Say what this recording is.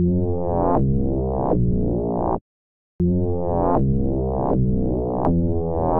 phasemod wub
a sound made in sunvox that goes bwow bwow bwow using phase modulation
b, sunvox